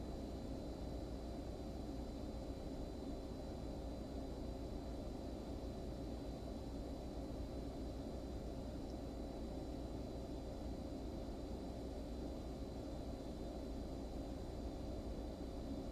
Short recording of a refrigerator or cooler running. Loops.